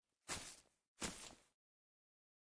Steps in Grass, Natural ambient